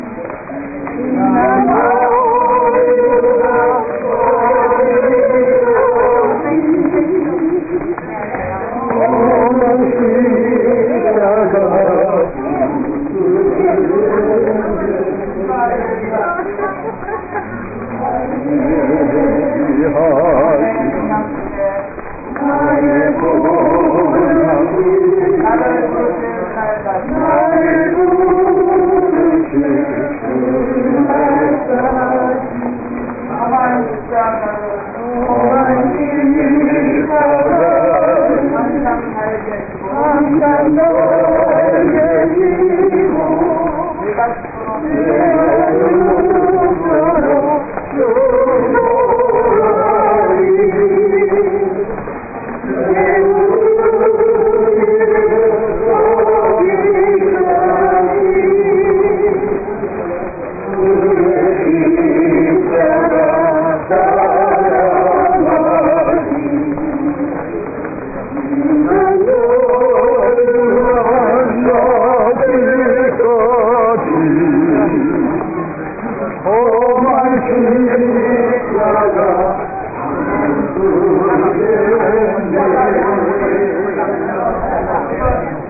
A group of Japanese or Korean tourists singing religious songs on Via Dolorosa, at the Muslim Quarter, in Old City of Jerusalem